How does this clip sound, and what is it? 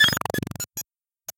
Glitchy sounds or little glitch beep mellodies.
Created using a VST instrument called NoizDumpster, by The Lower Rhythm.
Might be useful as special effects on retro style games or in glitch music an similar genres.
You can find NoizDumpster here:
You can find it here:
artificial, beep, beeping, computer, digital, electronic, glitch, harsh, lo-fi, noise, NoizDumpster, TheLowerRhythm, TLR, VST